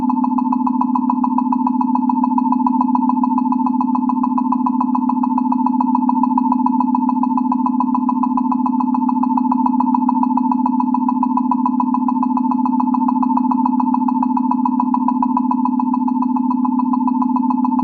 Astrogator1 STTOS recreated
A very naive approach to emulating the "astrogator" sound heard in many bridge scenes of the original series of Star Trek.
While it does capture some of the idea of that sound effect, it takes an unsophisticated approach to get there, adding some modulated tones with envelope shaping. It's too clean, misses a lot of the complexity of the original, and isn't all that interesting. I post it here primarily for comparison purposes. Ultimately, this is a very difficult sound effect to recreate from scratch, and this is the kind of thing you might come up with even with hours of work -- still falling short of the goal.
I think this would make a great "dare" for sound design experts.
abox, background, bridge, equipment, loop, noise, sci-fi, star-trek, sttos